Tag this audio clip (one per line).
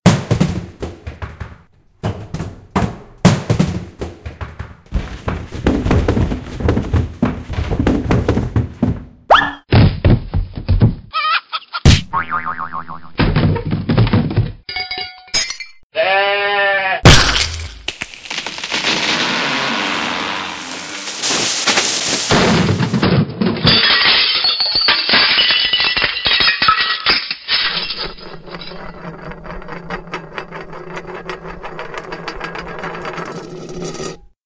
Theatre
Falling
Comedy
Cartoon